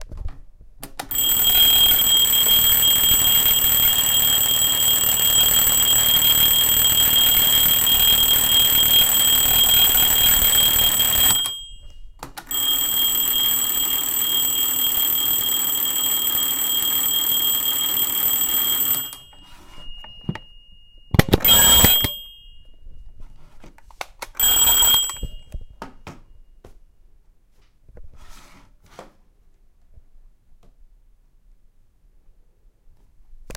alarm clock
clock, alarm-clock, alarm